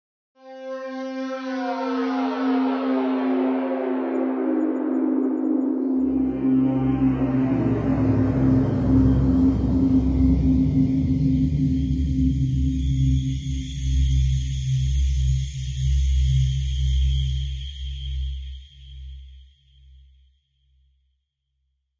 Coming Down
A rapidly descending sonorous pad repeated at a lower octave
ambient, descending, drone, intro, pad, pads, pitch-bend